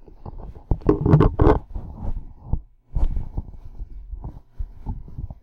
moving stuff around
noise, object, rummage